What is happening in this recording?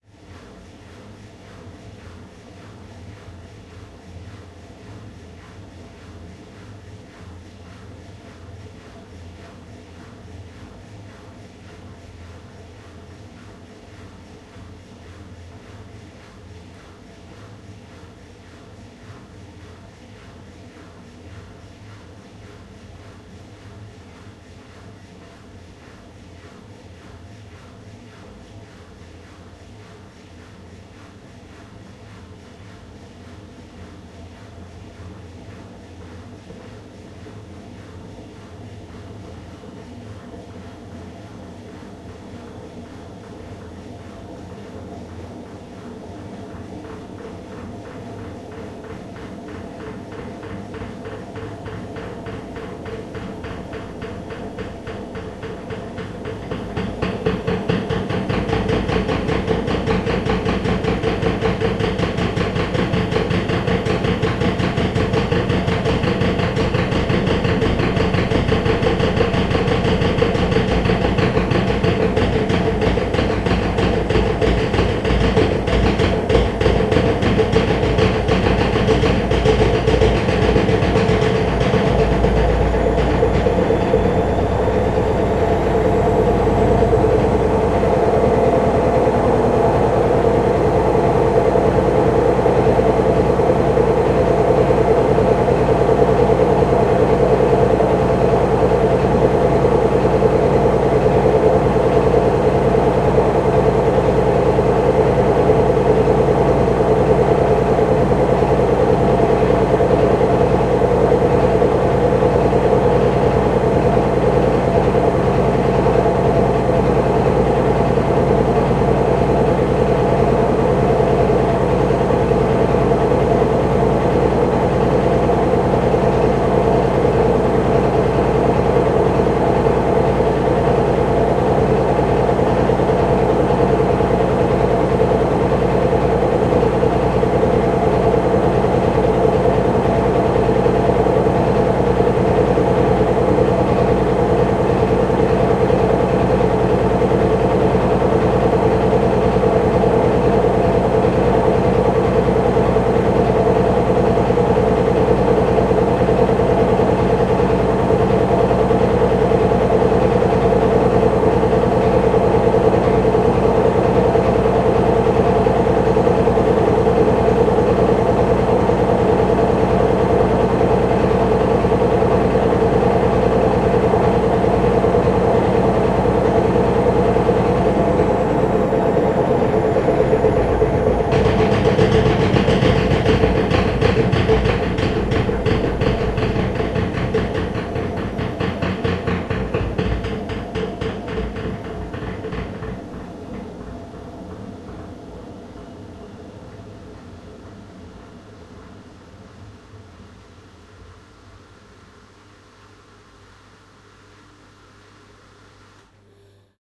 field-recording old washing machine